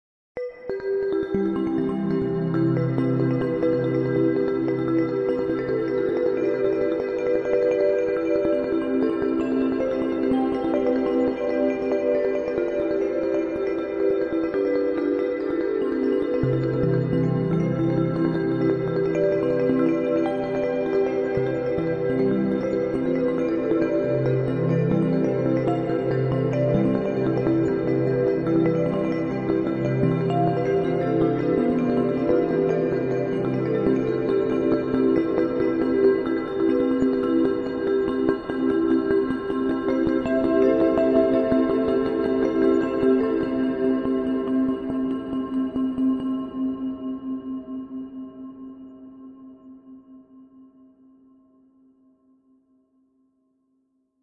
Wood Raindrops
Wood, Rhythmic, Raindrops